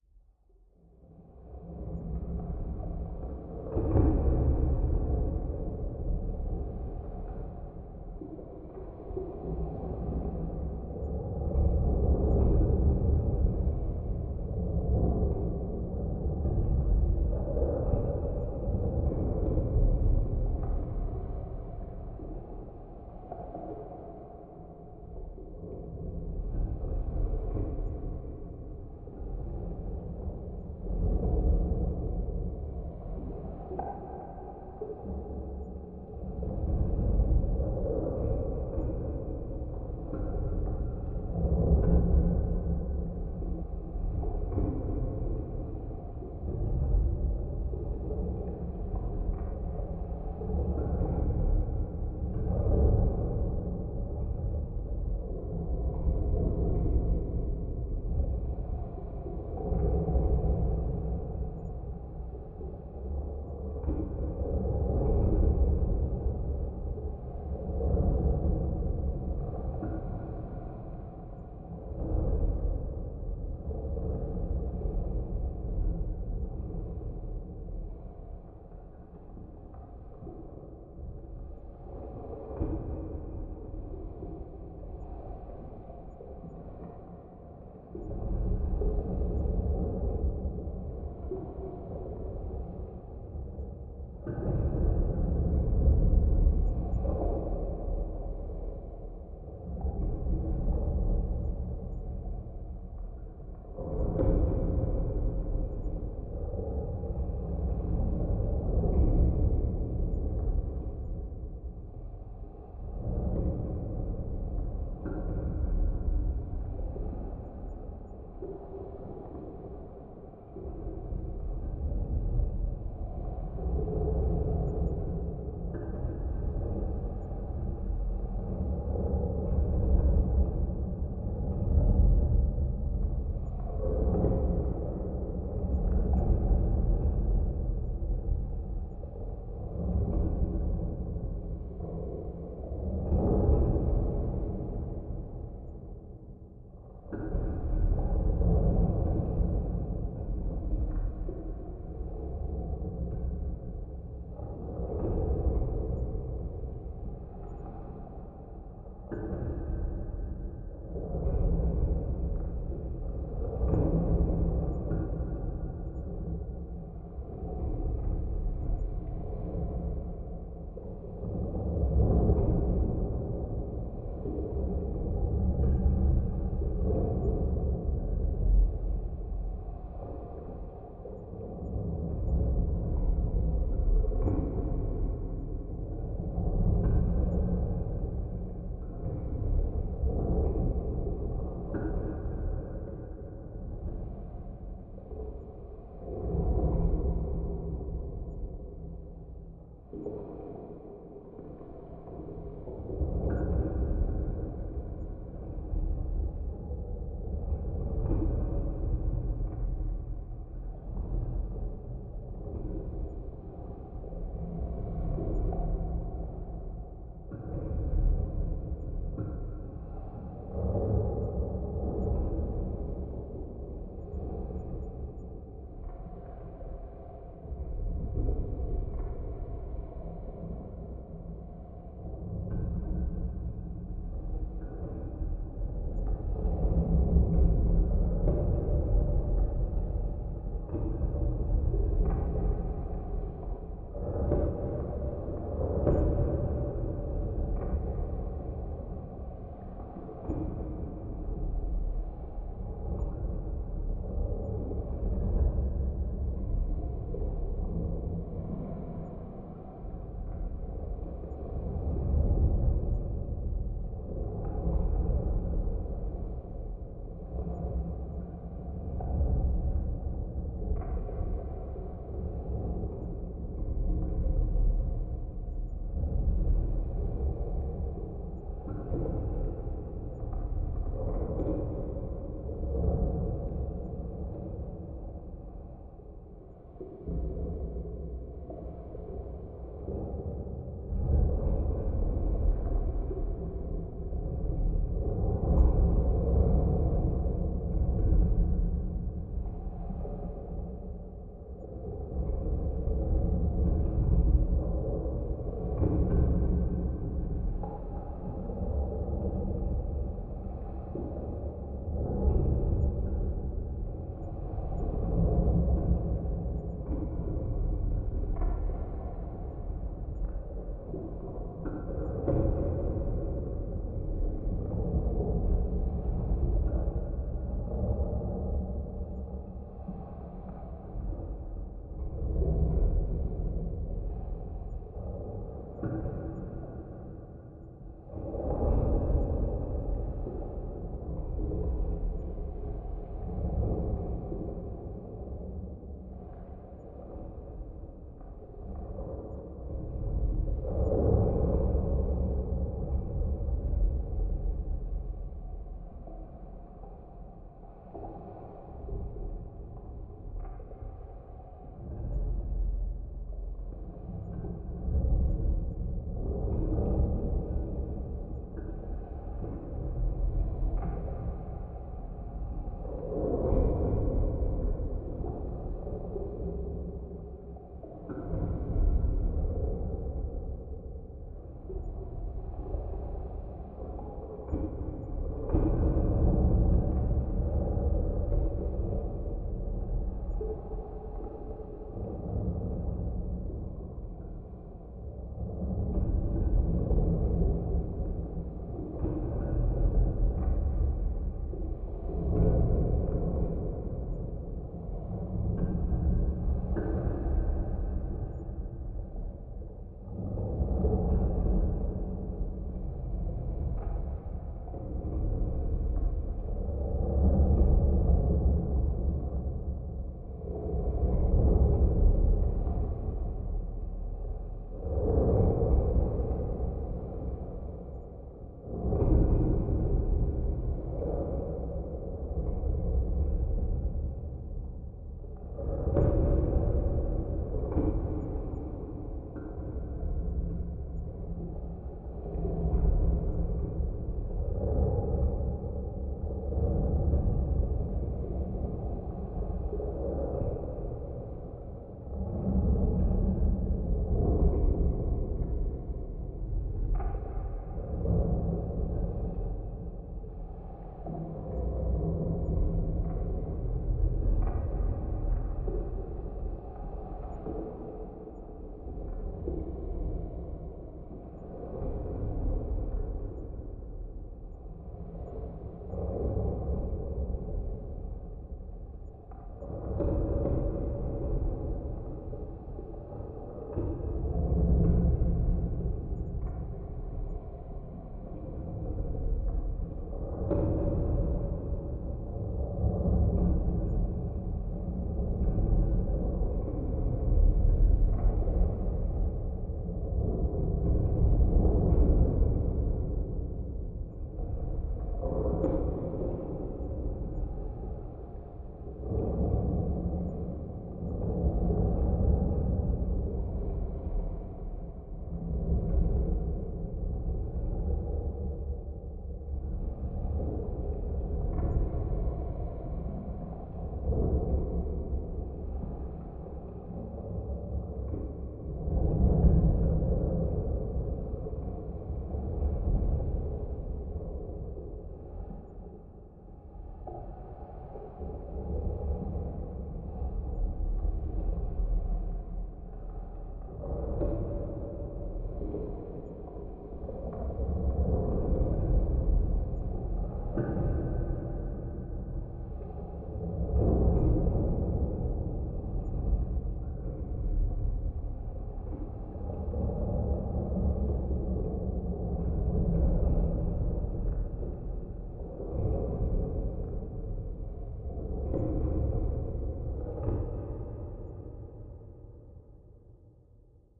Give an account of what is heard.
Atmosphere Cave (Loop)
I´ve made this atmo with padshop pro. If you wanna use it for your work just notice me in the credits.
Check out my other stuff, maybe you will find something you like.
For individual sounddesign or foley for movies or games just hit me up.